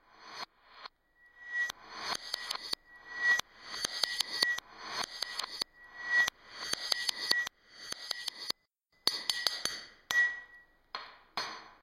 LE LOUVIER Lorine 2015 2016 Spoon
This sound is based on a recording sound from a spoon hitting a cup and a table. First, I duplicate this sound and I desynchronised the two sounds. The second begins 2 sec later. I repeat the first track once. I duplicate again the first track, this third one begins at 8 sec. I invert the sense of all the tracks except the last sound. Then I changed the low-pitched to -15db and the high-pitched to 6db. I amplify to 1.3 db.
Typologie:
V’’.
Morphologie:
1) Masse : groupe nodal.
2) Timbre harmonique: acide.
3) Grain: lisse.
4) Allure: pas de vibrato.
5) Dynamique: l’attaque est abrupte.
6) Profil mélodique: Variation serpentine.
7) Profil de masse: site.
cup, music, rhythm, spoon